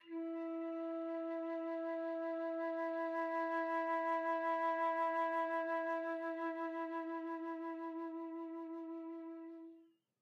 One-shot from Versilian Studios Chamber Orchestra 2: Community Edition sampling project.
Instrument family: Woodwinds
Instrument: Flute
Articulation: expressive sustain
Note: E4
Midi note: 64
Midi velocity (center): 95
Microphone: 2x Rode NT1-A spaced pair
Performer: Linda Dallimore
expressive-sustain, single-note, vsco-2